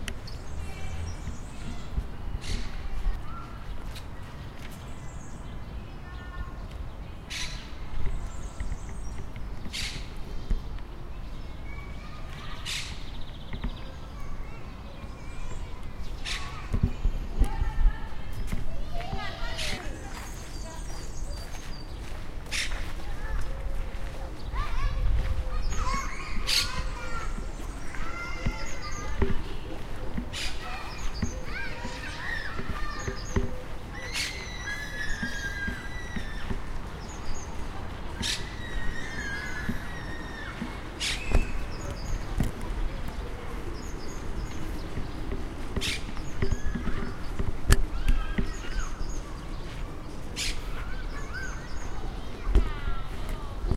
Un audio de diverses aus passeriformes i psitaciformes: com gafarrons, pardals i cotorretes de pit gris. Al parc del Fondo d'en Peixo a EL Prat de Llobregat, Barcelona.